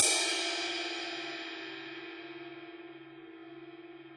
CC17-ZAMThn-Bw~v05
A 1-shot sample taken of a 17-inch diameter Zildjian Medium Thin Crash cymbal, recorded with an MXL 603 close-mic and two Peavey electret condenser microphones in an XY pair. The cymbal has a hairline crack beneath the bell region, which mostly only affects the sound when the edge is crashed at high velocities. The files are all 200,000 samples in length, and crossfade-looped with the loop range [150,000...199,999]. Just enable looping, set the sample player's sustain parameter to 0% and use the decay and/or release parameter to fade the cymbal out to taste.
Notes for samples in this pack:
Playing style:
Bl = Bell Strike
Bw = Bow Strike
Ed = Edge Strike
cymbal, multisample, 1-shot, velocity